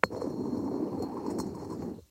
Sliding a teapot lid across a teapot. The lid is uncoated ceramic, while the teapot itself is coated. Recorded with a Cold Gold contact mic.